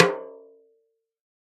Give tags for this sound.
1-shot; drum; multisample; snare; velocity

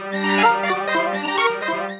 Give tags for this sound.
loop; lo-fi; motion

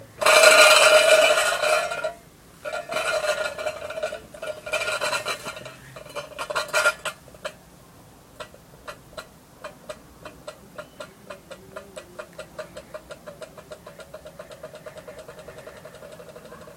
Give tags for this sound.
aluminium; can; roll; rolling; steel; tin; tin-can

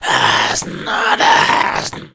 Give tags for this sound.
arcade; indiegamedev; minion; small-creature; gaming; game; Talk; goblin; videogame; games; Vocal; RPG; Speak; gamedeveloping; kobold; gamedev; videogames; fantasy; imp; indiedev; creature; sfx; Voices; Voice

